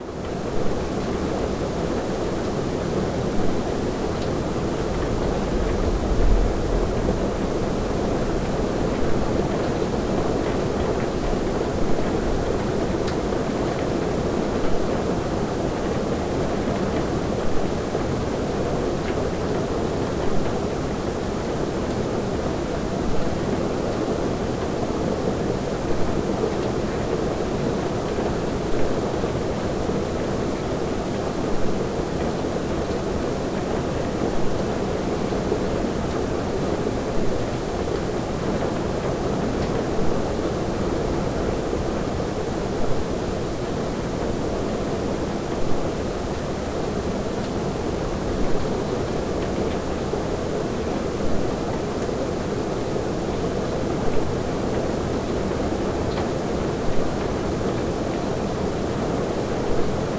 130915 - Interior Room - Hard Rain on Flat Roof
Interior Room - Hard Rain on Flat Roof